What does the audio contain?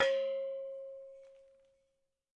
Percasserole rez B 3
household, perc